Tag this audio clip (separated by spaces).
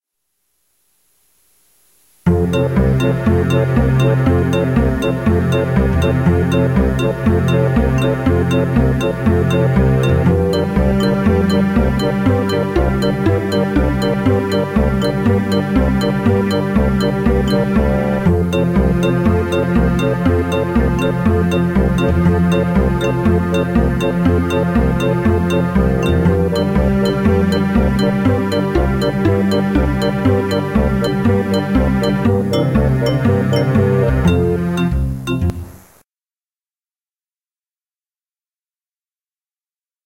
cybersynth cartoon instrumental background music